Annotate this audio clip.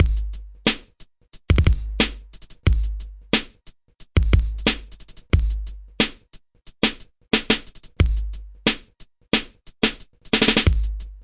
4bar beat 808 style
simple quantized loop, but about the sounds.
they consist of filtered vinyl chops going into a 950, layered with some quite buzzing 8 bit mirage hits. no additional effects used.
950, mirage, beat, drum-machine, 808